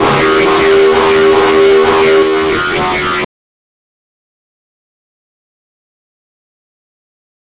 Creative audio routing and a drum machine.